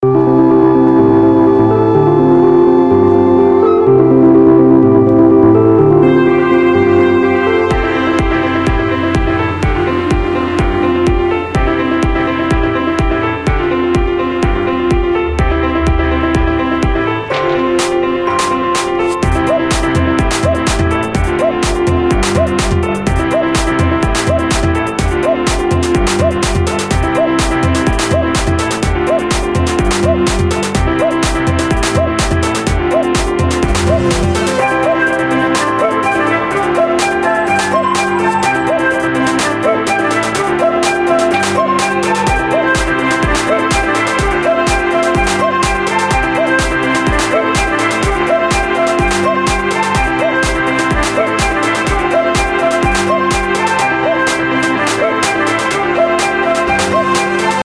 Upbeat loop
upbeat, 125bpm, happy, music, loop